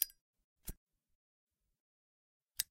Flicking a lighter open, lighting it and closing.